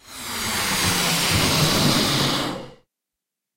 Balloon inflating. Recorded with Zoom H4